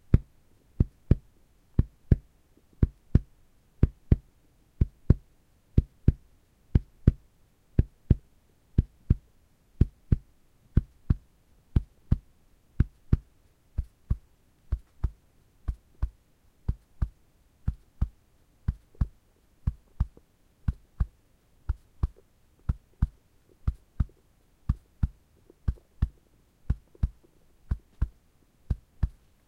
Hearbeat simulated by knocking against my sternum with my fist. First louder, then softer. Recorded indoors on a Zoom H1n.
beating, chest, heart, heartbeat